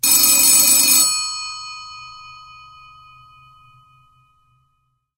Old german W48 telephone ringing ringtone